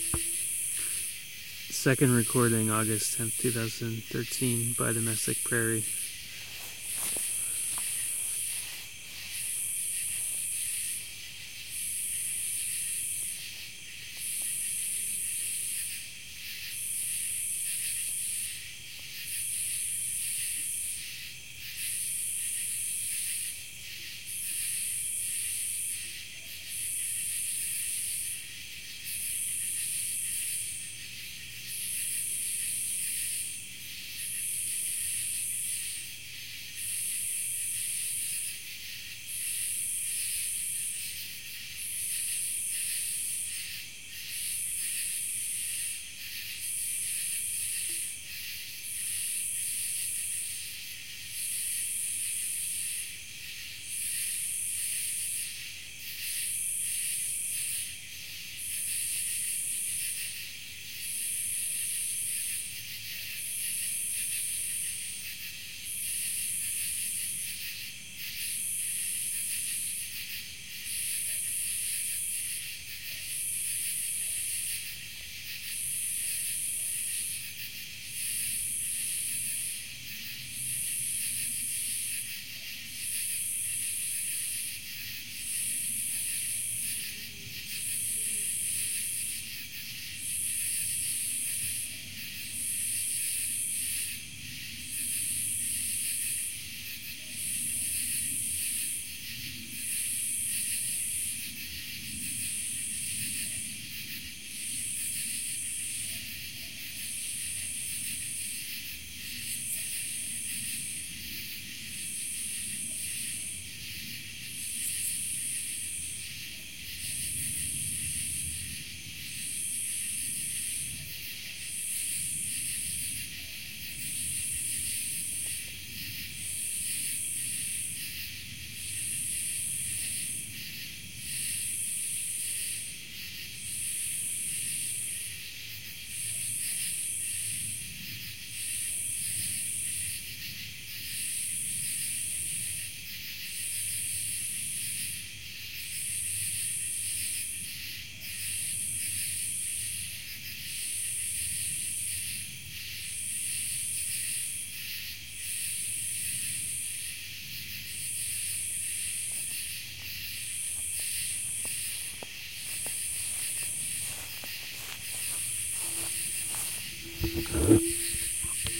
The second recording taken in the evening of August 10th 2013 in Williams, IN at the edge of the Mesic Prarie field.